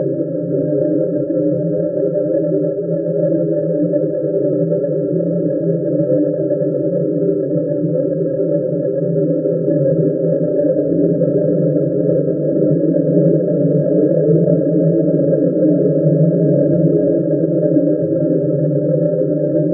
BGvesselNoise5 Choral
Unlike BGvesselNoise4_Choral, this one has a bit more distortion blended in, which you may find annoying or helpful depending on your intended usage. It is here just to give you some options, just like the other numbered variants. Created in cool edit pro.